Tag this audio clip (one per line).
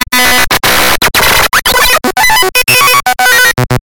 bytebeat
cell-phone
cellphone
phone
ring-tone
ringtone
sonnerie